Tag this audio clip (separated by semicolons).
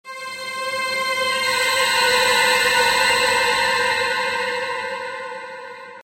rising; nightmare; Atmosphere; evil; haunted; demon; sinister; halloween; thriller; Environment; fear; horror; Scary; Violent; Ambient; paranormal; Creepy; Eerie; Video-Game; orchestra; doom; ghost; dark; violins; Spooky